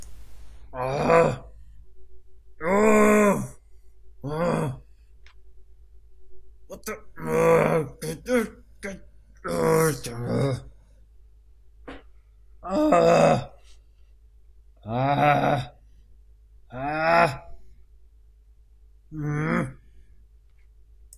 Guy - frustrated
Me grunting with frustration. Recorded with a simple computer microphone and touched up in Audition.
vocal, grunt, grr, male, man, human, voice, arg, frustrated, grunting